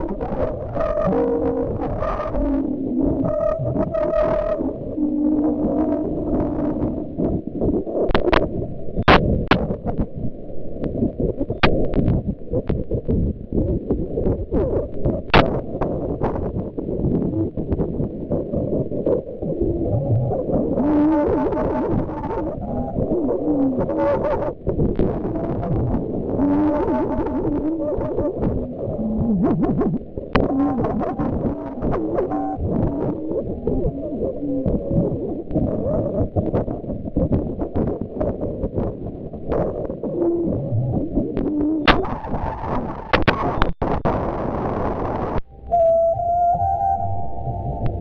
SDR 2014-09-03 11534KHz howling and whining
This is one of multiple samples I have recorded from short wave radio, and should, if I uploaded them properly be located in a pack of more radio samples.
How the name is built up:
SDR %YYYY-MM-DD%_%FREQUENCY% %DESCRIPTION% (unfortunately I didn't get to put in the decimals of the frequency when I exported the samples T_T)
I love you if you give me some credit, but it's not a must.
BTW: I tuned the radio during recording of this sample, so you won't find the exact sound if you tune in the frequency.
noise, radio, SDR, Short-wave-radio